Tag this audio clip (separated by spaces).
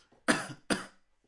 Bored Cough Sarcasm